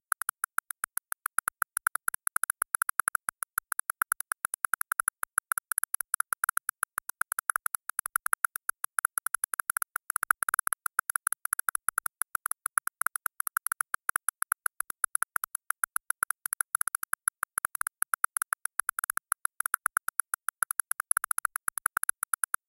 droplets noise 50-150
Dripping drops within 50 and 150 ms. Made in puredata.
puredata, drop, noise, filter, synthesis, attack, decay